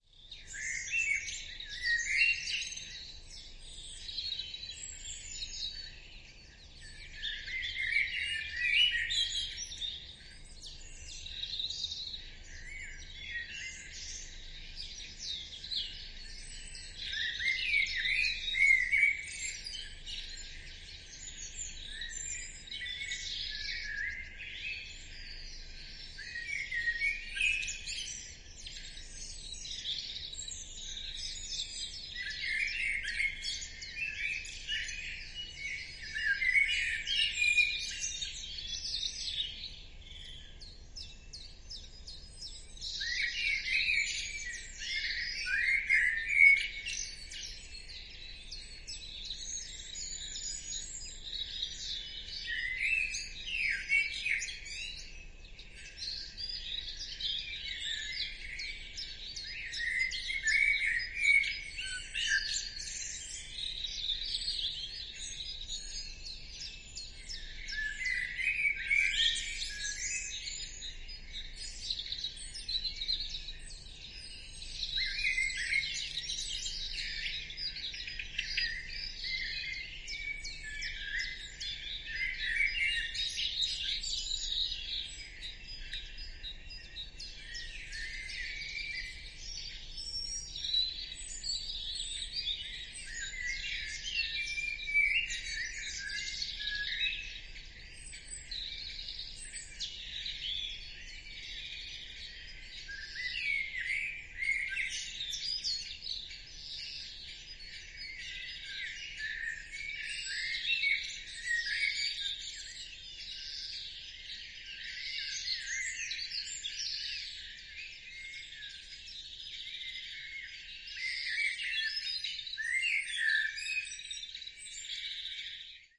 Outdoor ambience Blackbird in focus
Blackbird loud and clear as well as several other birds.
Outdoor ambience recorded with MS mic on Zoom H6 recorder.
ambiance, ambience, ambient, atmos, atmosphere, bird, birds, Blackbird, field-recording, nature, Outdoor, outside, room-tone, spring